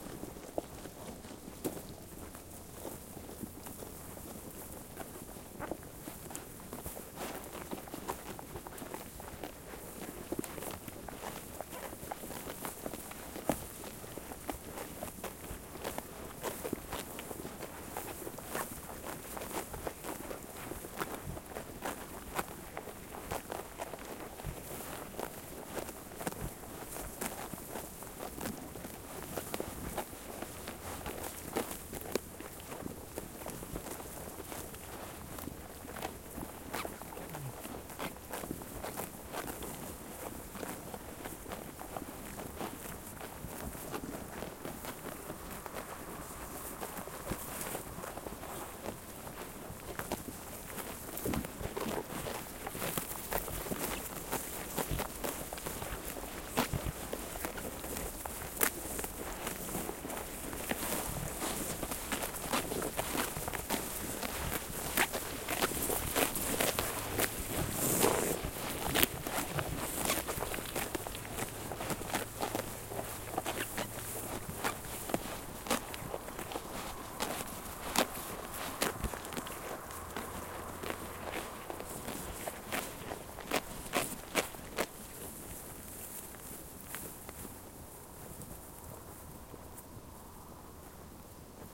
sheep chewing gras
ambiance, chewing, field-recording, gras, nature, sheep, summer